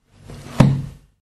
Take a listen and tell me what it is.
Closing a 64 years old book, hard covered and filled with a very thin kind of paper.